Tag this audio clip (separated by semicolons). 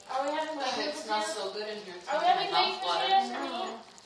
atmosphere; cooking; field-recording; food; holiday